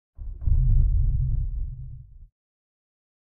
thunder edit
Thunderous rumbling for a storm in a game environment
nature, game, storm, environment, rumbling, thunder